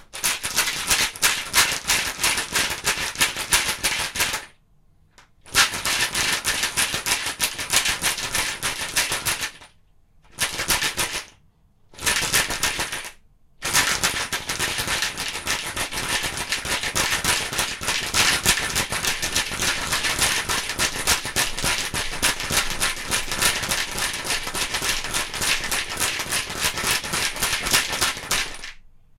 machine rattling
Rattling machine sound imitated with bolts shaken inside a plastic container.
But I would appreciate a word in the comments about what kind of project you plan to use it for, and -if appropriate- where it will probably appear.